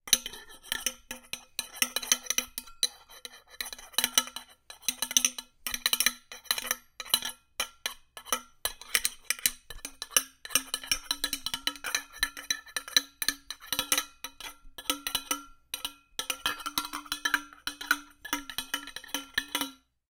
Metal Canteen Rattle 002
The sound of a canteen or another type of metal container being rattled around or something rattling inside of it.
metal, jug, container, cantine, clink, rattle, clank, flask, bottle, jingle, canteen